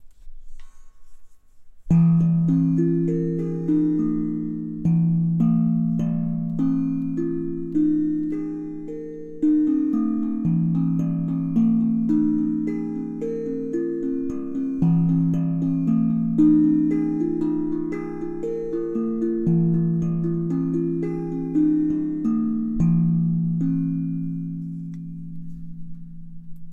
sounds made by me on a tank drum tuned to a pentatonic scale.